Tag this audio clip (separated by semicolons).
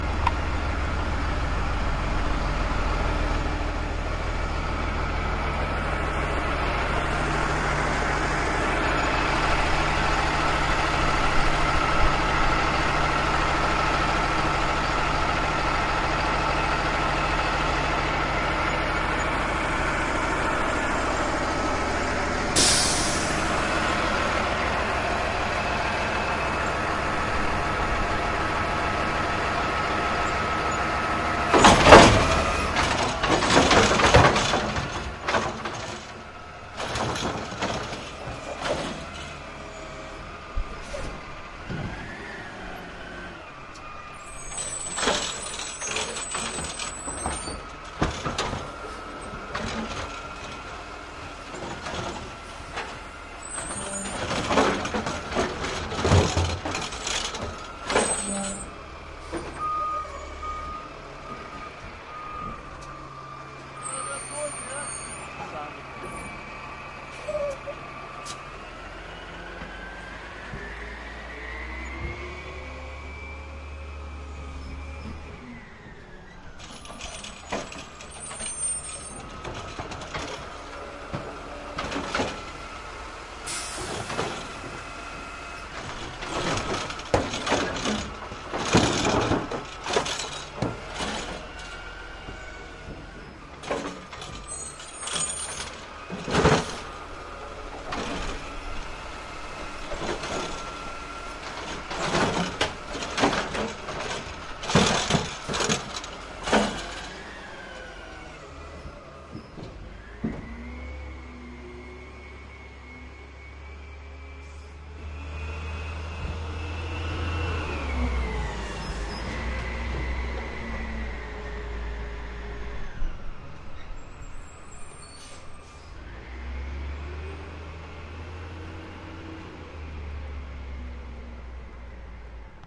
bin; hydraulic